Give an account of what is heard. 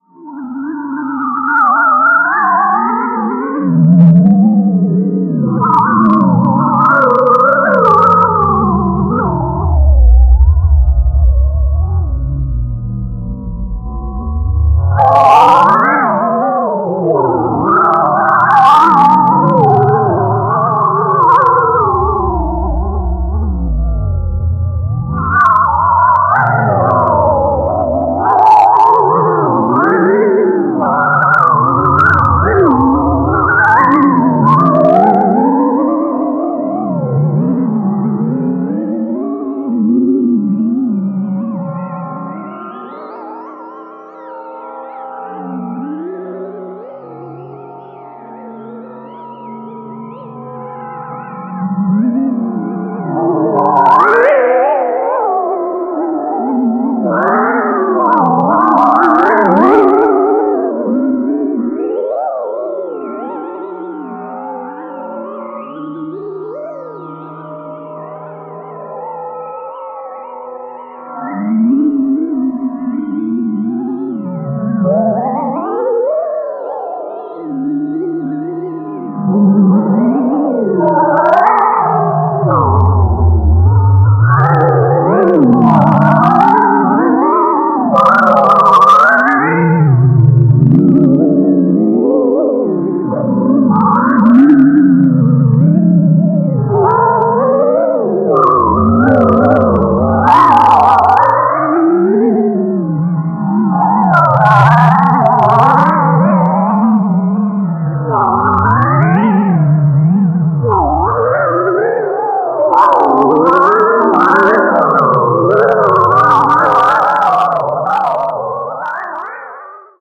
This sample is part of the "Space Machine" sample pack. 2 minutes of pure ambient deep space atmosphere. Pure weirdness from very low till high.